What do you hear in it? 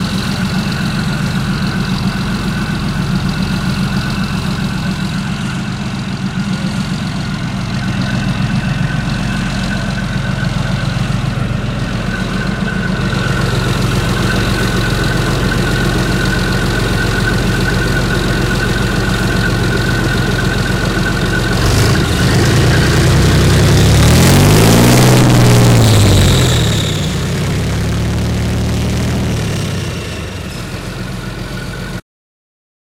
WAR-LEOPARD, TANK-ENGINE, THROTTLES-Leopard 2A4 engine, throttle-0002
Heavy trucks, tanks and other warfare recorded in Tampere, Finland in 2011.
Thanks to Into Hiltunen for recording devices.
engine, Leopard2A4, tank, warfare